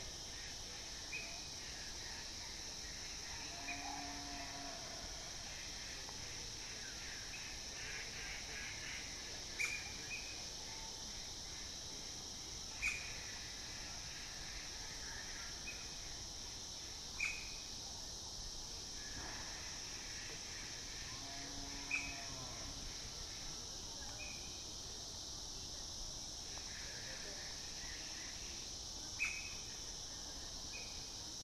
BR 079 Himalaya naturesounds
Himalayan nature sounds, recorded in Sikkim (a state located in north-est India, just near Tibet).
Here, you can hear typical nature sounds of this region, like cicadas, distant water-stream, birds, and some distant voices…
Recorded in september 2007, with a boss micro BR.
ambience, birds, cicadas, Field-recording, Himalaya, mountains, nature, nature-sounds, water-stream